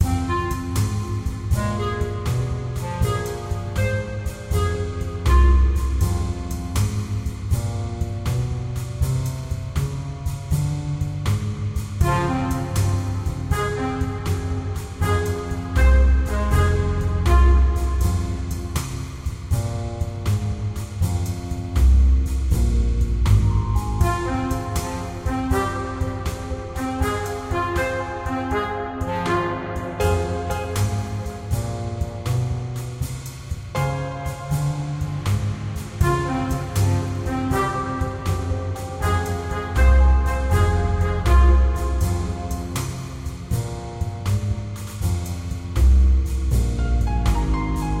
jazz music loop
an 80 BPM Jazz loop made with the Kontakt default library.
jazz, music, loops, game